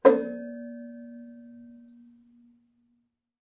metal bang 01
soft hit on a brass sculpture
hit, metal